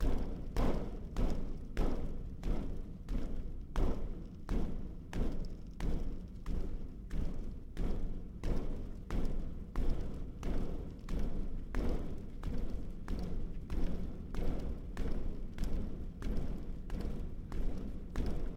Low Rumbling
rhythmic, rumbling, Low